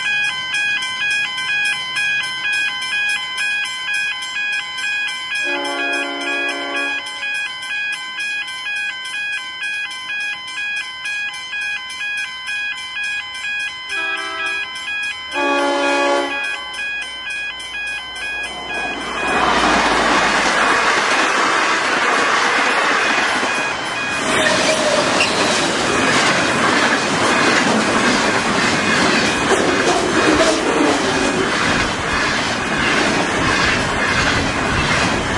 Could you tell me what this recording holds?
RailwayCrossing2Trains
train, train-horns, carriages, crossing-alarm